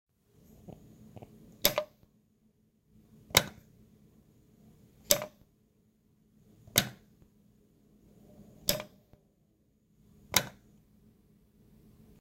light click
switch, light, light-switch